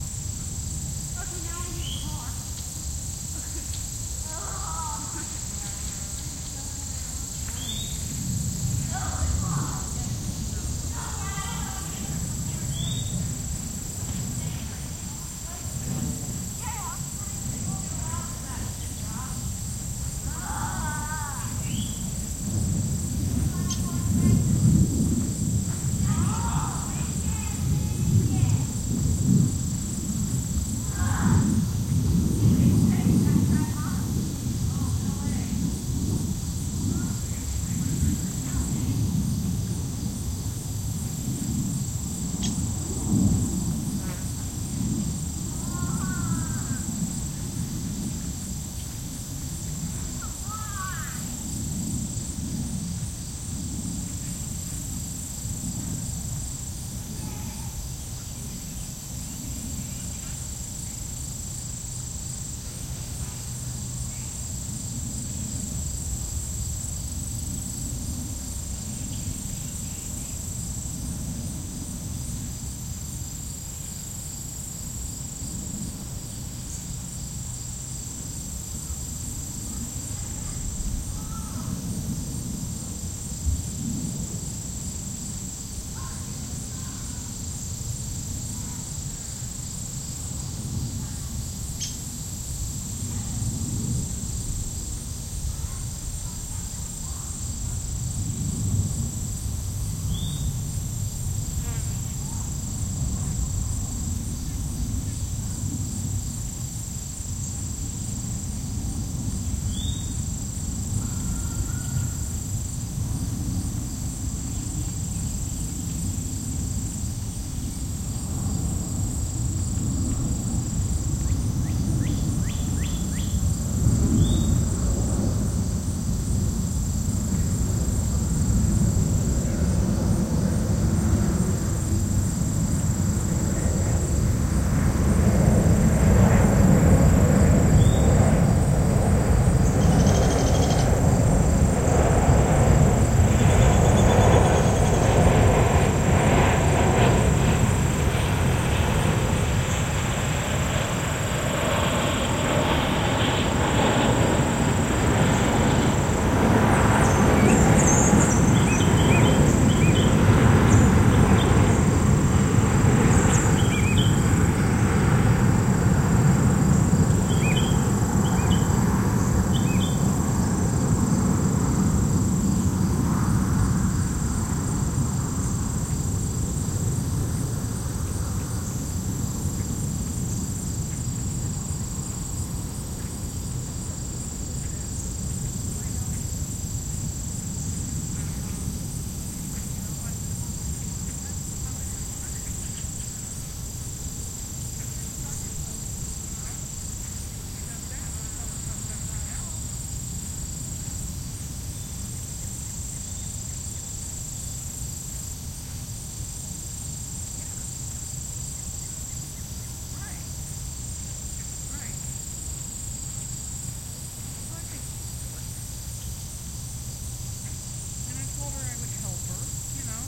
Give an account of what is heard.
Birds and insects near a pond in a meadow at Charleston Falls Preserve in Miami County, Ohio. A jet descends to land at a nearby airport.